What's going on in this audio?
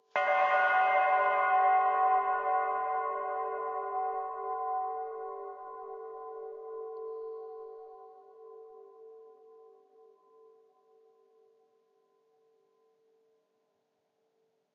Big Bell with Verb
The raw version of this sample was made by hitting my knuckle on a metal sculpture of an aircraft.
De-clipped and De-noised just slightly using Izotope RX
Verb, EQ and comp in Logic Pro 8 + plugins
Recorded using the SpectrumView iPhone app
iphone-recording, bell, spectrumview-iphone-recording, big-bell, loud-bell, field-recording, cathedral-bell